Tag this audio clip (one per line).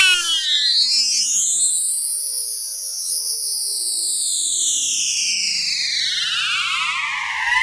processed
sound
synthetic